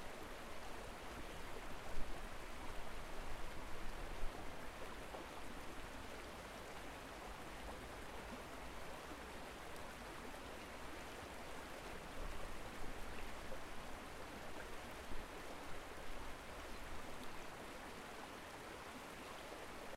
The Vale Burn - Barrmill - North Ayrshire
recording of the small Vale Burn (burn is scots for stream, brook ect) that runs by the North Ayrshire, village of Barrmill. Recorded using zoom h4n